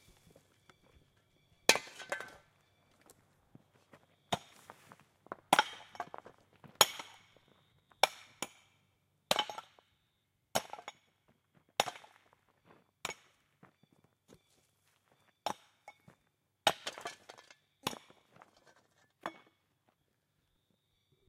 Pick axe striking rocks #2
Pick striking rocks at road cutting, Leith, Tasmania, shovel put down at start. Recorded on a Marantz PMD 661 with a Rode NT4 at 11:35 pm, 15 Feb 2021, Take 2
dig, Field-recording, pick-axe, rock, shovel